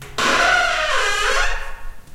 Wooden Door Squeaking Short 2
Series of squeaky doors. Some in a big room, some in a smaller room. Some are a bit hissy, sorry.
discordant, screech, slide, closing, squeaky, sliding, portal, wood, open, heavy, close, squeak, squeeky, shrill